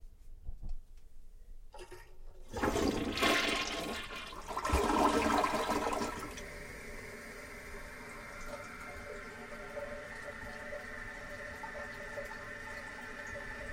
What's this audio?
Toilet Flushing
Flushing my toilet
bathroom, flush, flushing, restroom, toilet